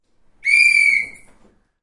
mySound GPSUK metalwhistle
Blowing a metal whistle
Galliard, School, UK, whistle, Primary